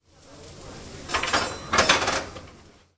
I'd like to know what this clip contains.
cooking utensils
This sound was recorded in the UPF's bar. It was recorded using a Zoom H2 portable recorder, placing the recorder next to the waitress while she was preparing a sandwich.
This sound is very short but concrete.
bar, campus-upf, cooking-utensils, UPF-CS13